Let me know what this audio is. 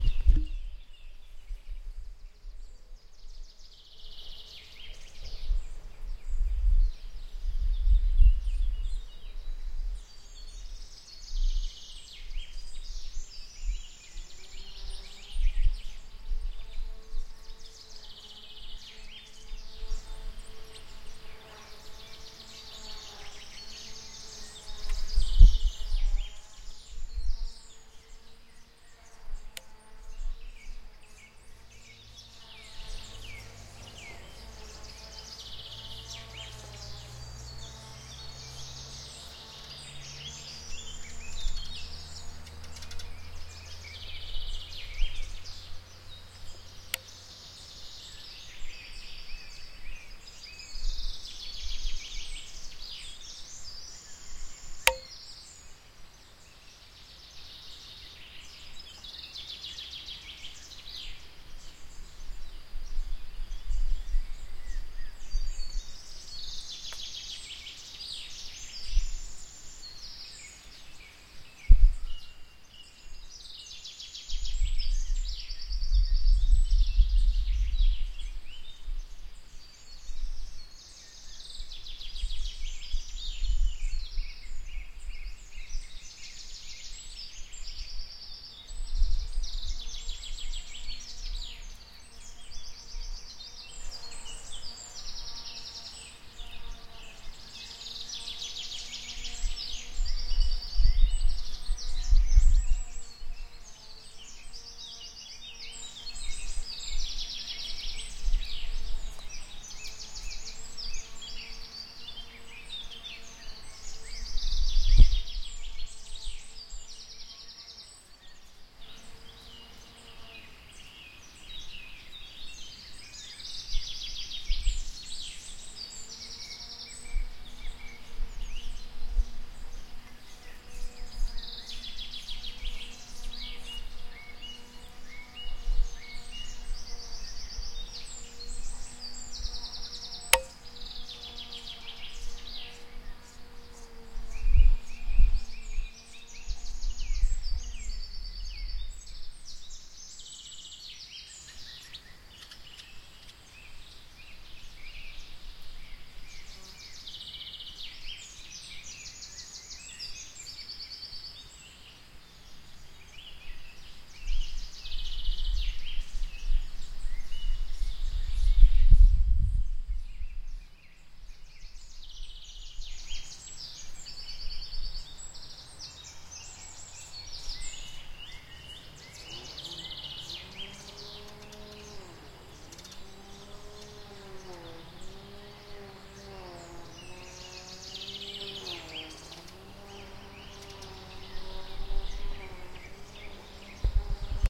Field-recording of nature in Austria. My first recording done with a "Zoom recorder". Hope you enjoy.